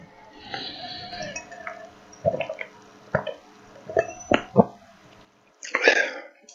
Man drinking soda.
ahh, chugging, consume, cup, delight, drink, drinking, fresh, gas, gulp, juice, liquid, satisfy, sfx, sipping, slurp, soda, swallow, swallowing, tasting, throat, water